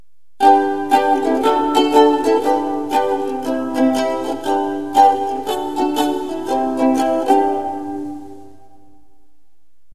Some chords played on the Ukulele, an unfashionable four-stringed guitar-like instrument.
chord, music